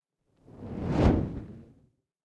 24 FIACCOLA PASS
effects, torches